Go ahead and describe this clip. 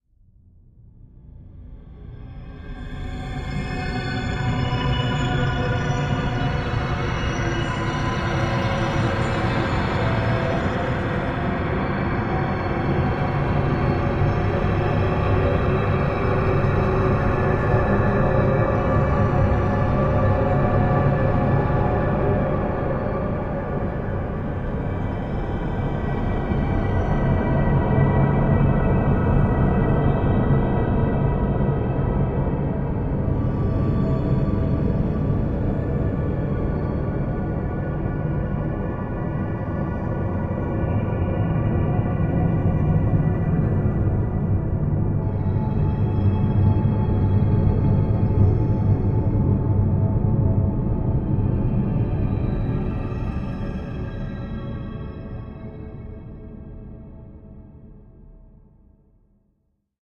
A spacey soundscape I did in MetaSynth.